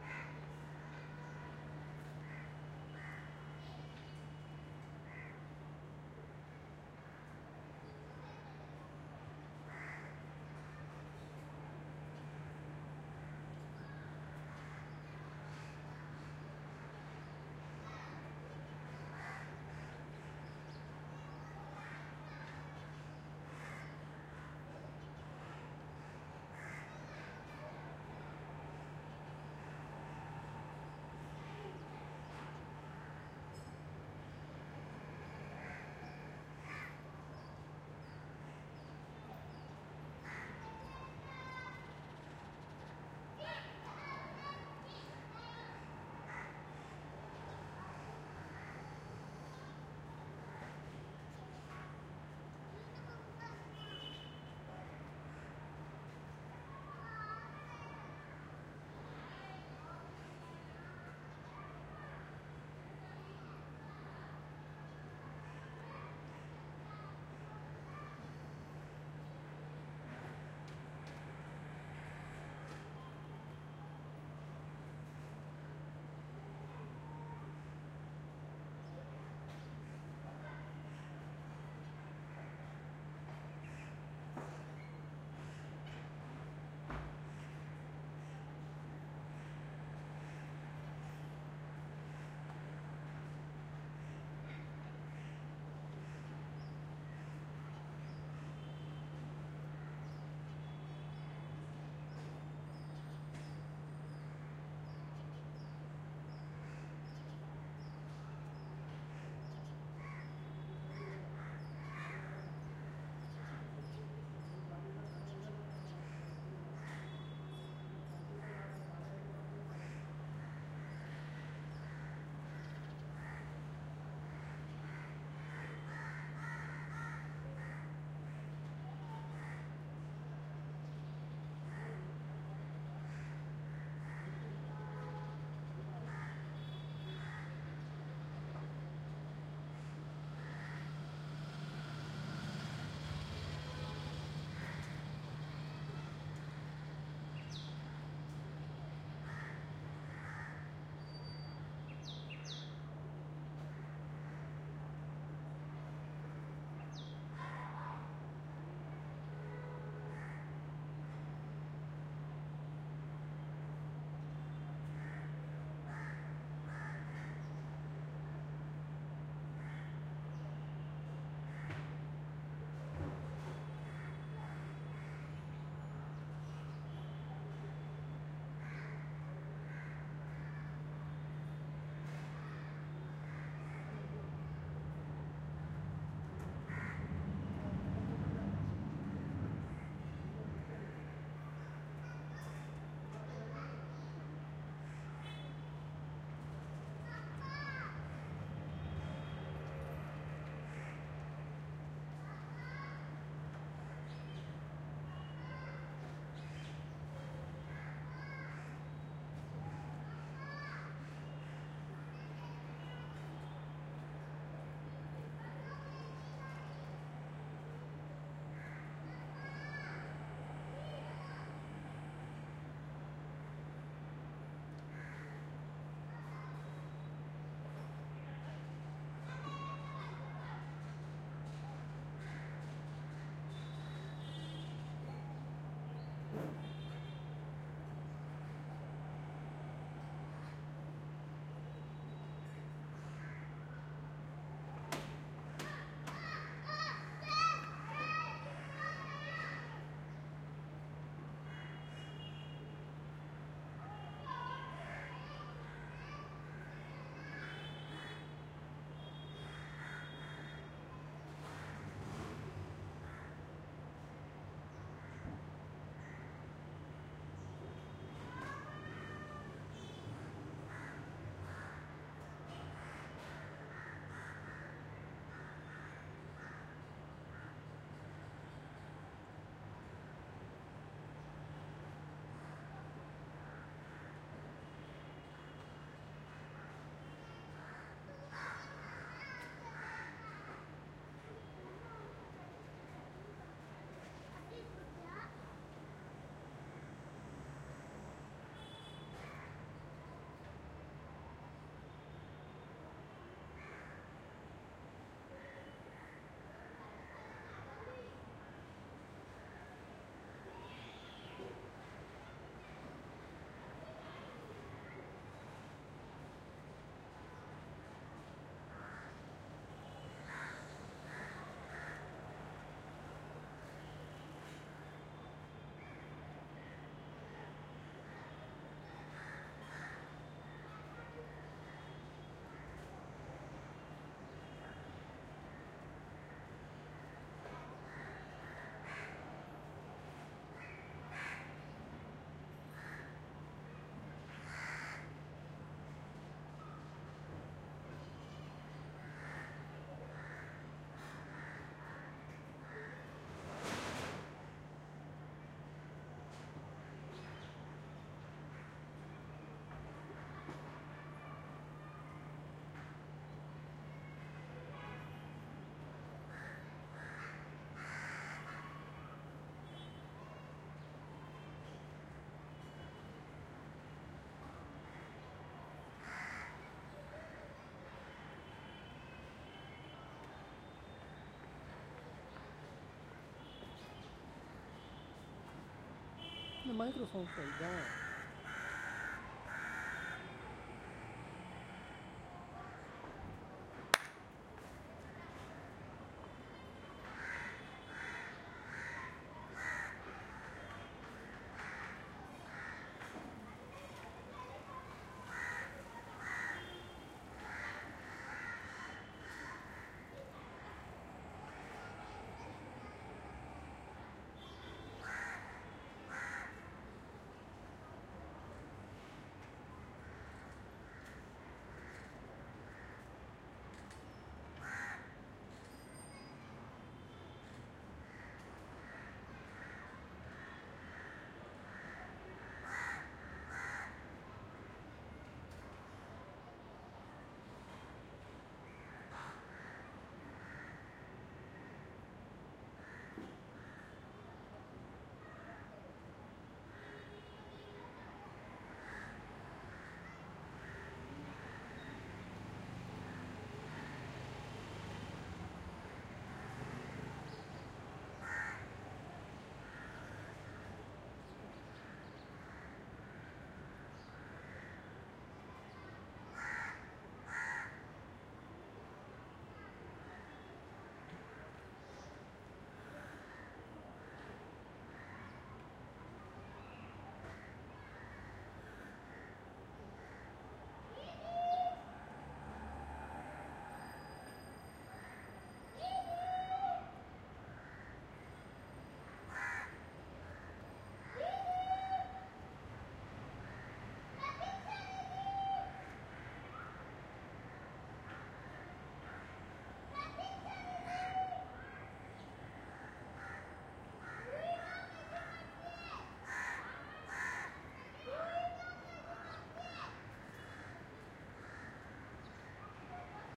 India Alleyway ambience - distant BG traffic, kids playing, crows , birds
A long 12 mn recording with a rode nt4 of an alleyway in Mumbai
ac, ambiance, ambience, background-sound, distant, India, kids, Mumbai, traffic